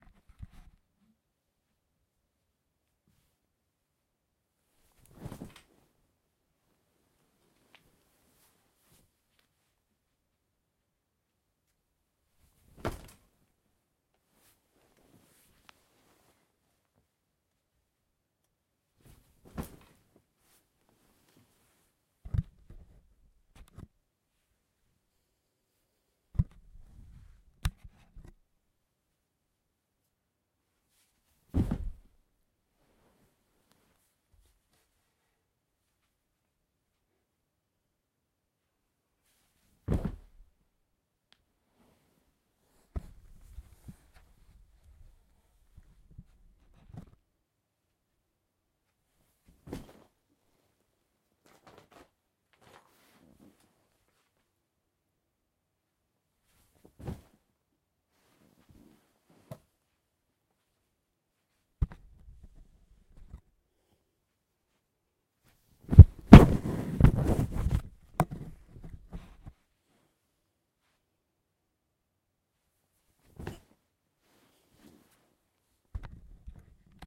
Body Fall Drop Sit Down on Sofa Bed
Falling Landing Body Hit Fall Soft